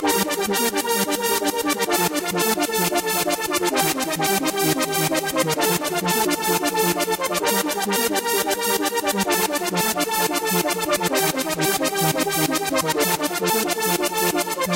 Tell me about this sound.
If We Only Knew 03
drumloop, pad, hard, drum, phase, kickdrum, bass, techno, sequence, melody, beat, strings, distorted, trance, 150-bpm, synth, kick, distortion, hardcore